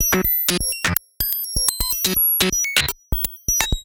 ClickerGroove 125bpm02 LoopCache AbstractPercussion
Abstract Percussion Loop made from field recorded found sounds